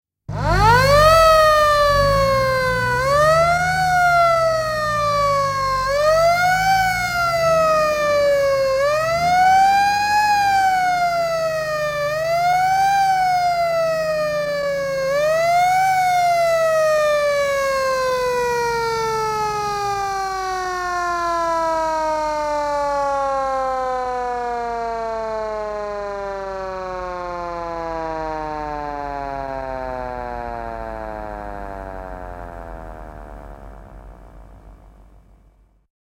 Paloauto, vanha, sireeni, hälytysajoneuvo / Emergency vehicle, old fire engine, stationary siren, wail, motor sound, long fade
Hälytyssireeni paikallaan, ulvova (wail) moottori kuuluu. Pitkä sammuminen.
Paikka/Place: Suomi / Finland / Helsinki
Aika/Date: 09.02.1957
Paloauto, Emergency-vehicle, Finnish-Broadcasting-Company